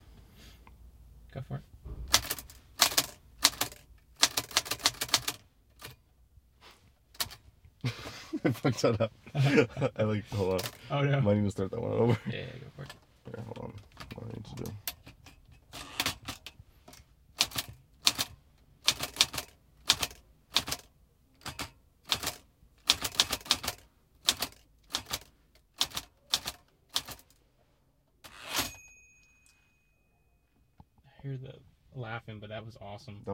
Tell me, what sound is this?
Clicking of an old typewriter with a roll and ding at the end